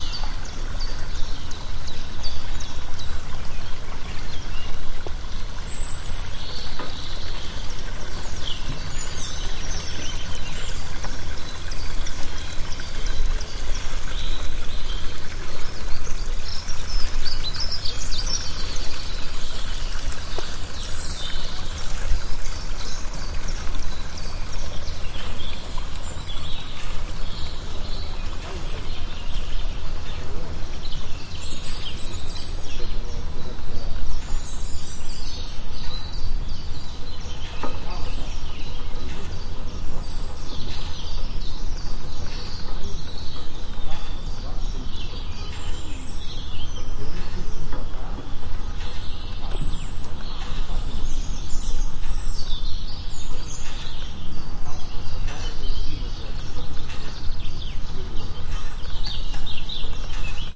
Recorded with Sony PCM-D50 in June 2014 on the cableway in the Carpathians, Ukraine.
ambient, summer, water, birds, field-recording, ropeway, ambience, PCM-D50, forest, cableway, mountain, Ukraine, Karpaty, ambiance, sony, Carpathians, Ski-lift, nature, stream